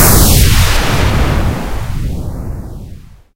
rocket exhaust 1
White noise manipulated until it sounds like a rocket or missile being launched.
rocket, launch, missile, exhaust